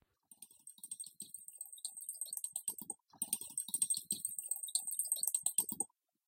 I used a spoon for this song and I used 3 differents effects:
- j'ai changé la vitesse pour l'augmenter jusqu'à 237.58
- j'ai fait un fondu en ouverture puis j'ai inverser le sens
Je voulais qu'il y est une mélodie comme un carillon donc j'ai augmenter la vitesse puis j'ai fait un fondu en ouverture.
bass, spoon